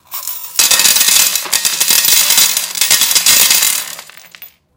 glass tinkling 211106-120037 pitch
For our 2021 version of Christmas Carol we created an effect of a candle that glows ever brighter until it explodes with the tinkling of glass shards on the stage to accompany the ghost of Christmas Past. To get the tinkling effect we dismantled an old crystal chandelier and then I poured them out of a cup onto a piece of glass. During the show this was combined with a flashbulb explosion and lighting effects.
chandelier, tinkling, crystal, dropping, flashbulb, glass, shards, past, xmas, christmas, carol